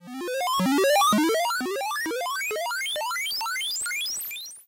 Advancing level
chip, computer, arcade, lo-fi, retro, computer-game, video-game, chippy, 8-bit, vgm, decimated, game, noise, sweep, robot, chiptune